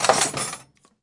Steel Drawers 20
a steel drawer being opened, this is more of the metallic contents - spanners and other tools shaking around as it moves.